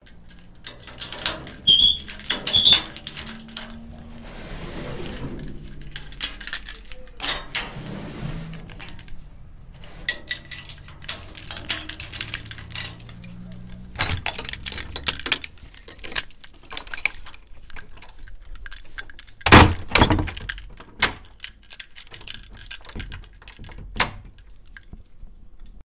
Open Gate and Door
Opening of safety gate and door
Door, Opening-door